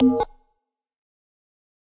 Square sound with square-shaped LFO modulating filter frequency.
filter, key, square